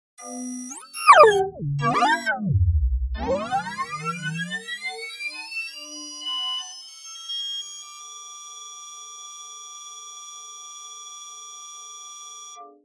Futuristic sound fx.
computer, loading, digital, machine, strange, noise, power, sci-fi, Robot, load, dc, ac, sound-design, abstract, future, system, fx, weird